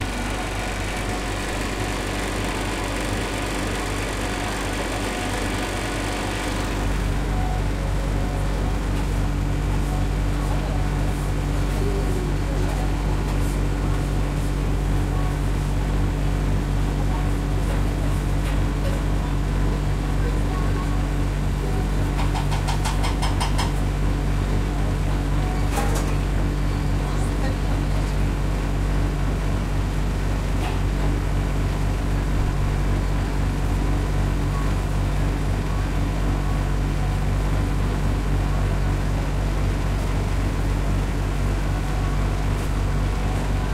shop refrigerator1
Hum of the refrigerator in the super market.
See also in the pack.
Recorded: 08.03.2013.